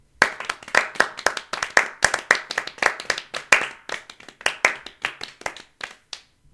A short clip of light applause, could be used for awkward applause as well. Recorded in studio so no crowd noise.
crowd, light, small